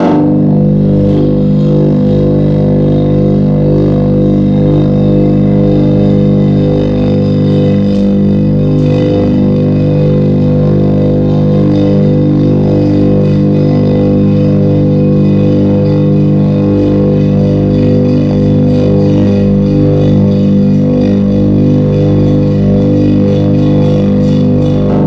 Mains Hum
UI sound effect. On an ongoing basis more will be added here
And I'll batch upload here every so often.